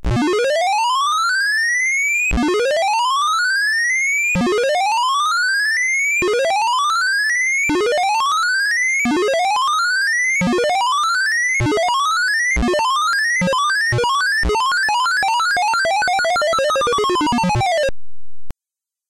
Audio demonstration of the bubble sort algorithm from a Quick Basic 4.5 example program called SORTDEMO.BAS